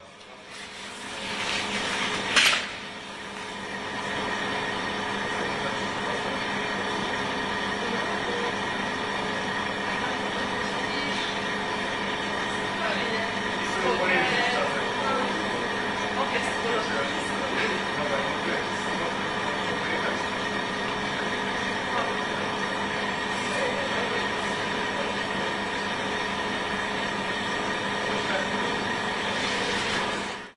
corridor drone 181210
18.12.2010: about 12.30. The School of Humanistics and Journalism on Kutrzeby St in Poznan. the corridor on 3 floor in the new building. the drone of coffeemachine and drinks machine.